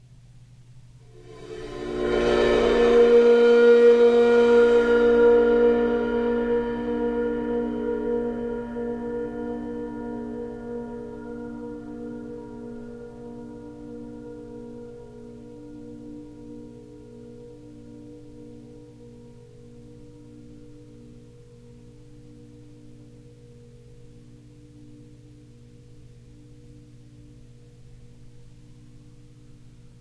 Cymbal Swell 110
bowed cymbal swells
Sabian 22" ride
clips are cut from track with no fade-in/out.
ambiance, ambient, atmosphere, bowed-cymbal, overtones, Sabian, soundscape